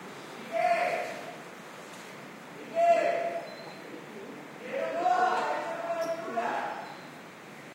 a young man shouts something unintelligible in Spanish, there is rhythm and some singing in it
field-recording, male, rhythm, shout, speak, voice, yelling